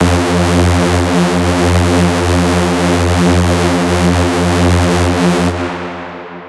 SemiQ leads 20.
This sound belongs to a mini pack sounds could be used for rave or nuerofunk genres
abstract, effect, electric, fx, intros, sci-fi, sfx, sound, sound-design, soundeffect